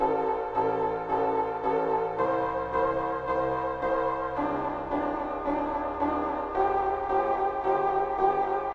bassline synth 110bpm-03
bassline synth 110bpm
club, house, dance, bass, trance, beat, progression, hard, bassline, 110bpm, rave, synth, electro, electronic, techno, loop